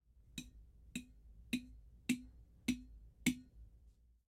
glass jar tap palm
a large glass jar being patted by a palm
glass, tap